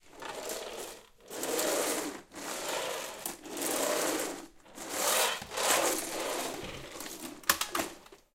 Vacuum rolling on cement in a garage
Recorded in stereo with a Zoom H6. Rolling a vacuum across the cement floor of a suburban garage.